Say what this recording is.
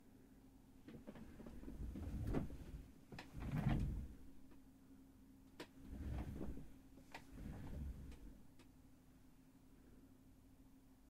Foley of a chair that's pushed backwards
rolling, carpet, chair